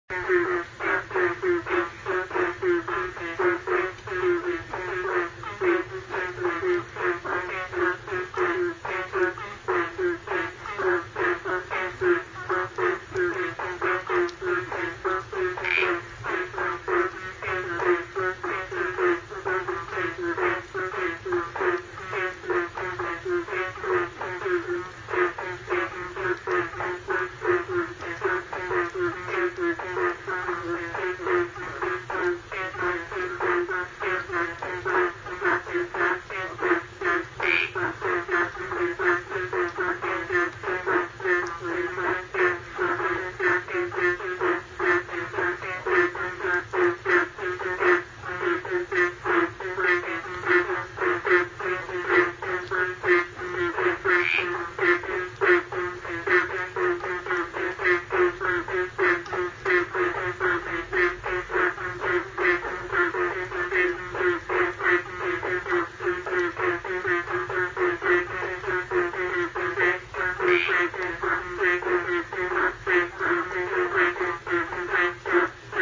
Happy Frog
Sound recording of frog community singing in the pool after the rain stopped in Bali
field-recording, frog, happy, nature